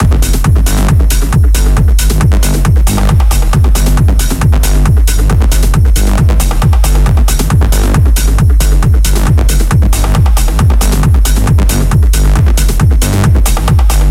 Dark Zion 2
A dark, dance, loop with sidechaining effects and four on the floor.